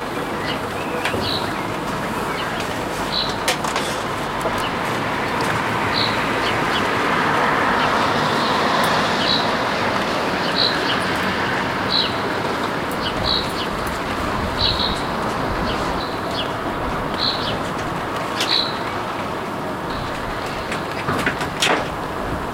Town Sounds Traffic Birds Wind
Some sounds recorded from my attic's window. Traffic, birds, weather. Recorded with Edirol R-1 & Sennheiser ME66.